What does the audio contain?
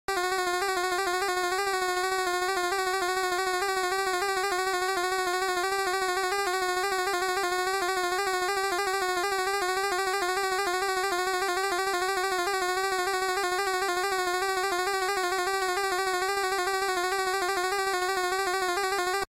Wavering Text Scroll E4 7 200
A sound made in Famitracker that could be used during scrolling text to portray a character talking. The notes are based around E of the 4th octave.
8-bit, changing, old, read